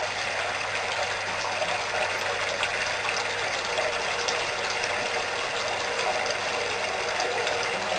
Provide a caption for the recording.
running bath 2
The sound of running water going into a bath. Recorded on my digital
video camera. This is a more compressed version of the other running
water sound.
bath, flowing, running, water